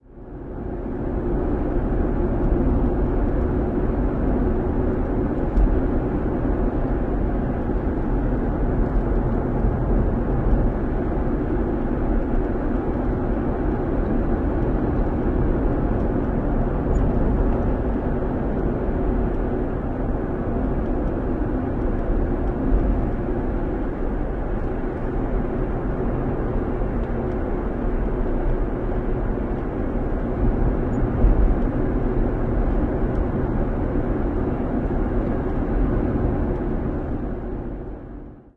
sorento interior 35mph
Sanken stereo recording the charming hum in the back seat of my SUV.
car, driving, engine, interior, suv